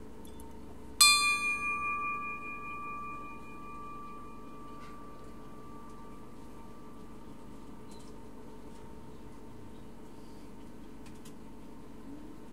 Ring bell Morskoi Volk bar2
Ringing bell in the bar "Morskoi volk" ("Sea dog"). Novosibirsk, Academ gorodok.
Recorded: 2013-12-11.
Recorder: Tascam DR-40.
ring, bar, bell, ringing, ships-bell, ding